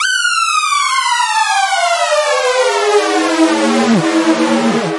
SemiQ effects 17
This sound is part of a mini pack sounds could be used for intros outros for you tube videos and other projects.
abstract, effect, fx, sound, soundesign